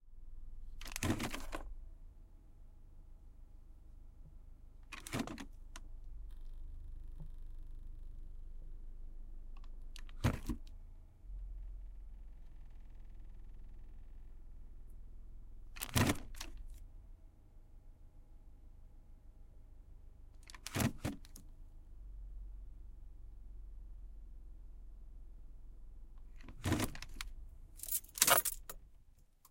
Gear Shift - Park Reverse Drive - Interior Honda
I move the gear shifter from Park to Reverse to Drive, and back and forth. This is the sound of the driver manipulating the stick, not the car's transmission changing gears. You can hear the vehicle idling softly.